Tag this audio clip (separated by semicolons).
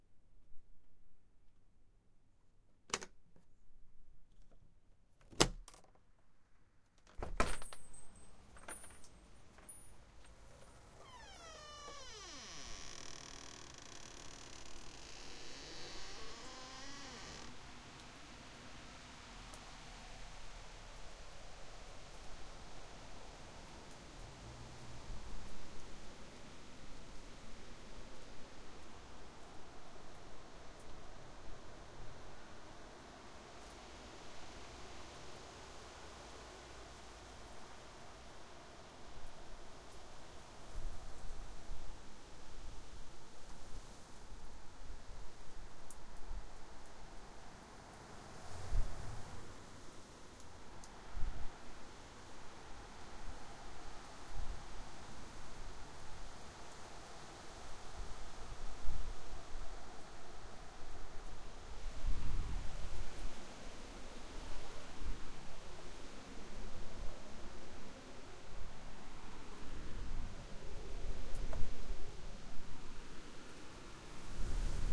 2011; autumn; bells; door; field-recording; groningen; haunting; opening; psychedelic; squeak; squeaky; storm; wind; windy; xzhi